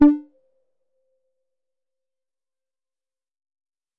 Tonic Short Electronic Effect
This is a short electronic effect sample. It was created using the electronic VST instrument Micro Tonic from Sonic Charge. Ideal for constructing electronic drumloops...